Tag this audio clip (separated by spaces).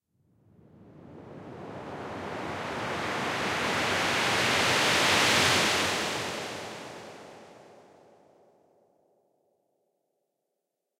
buildup noise